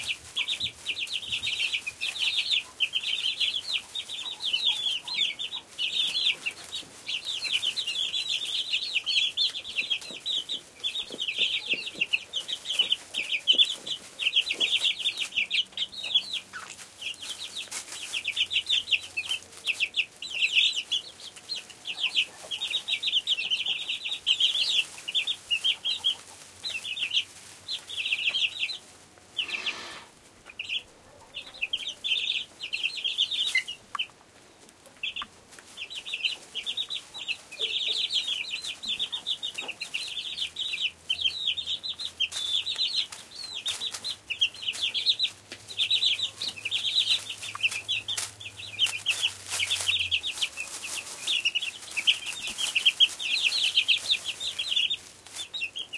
chicks in hen house low ceiling barn room crispy
room, low, ceiling, hen, chicks, house, barn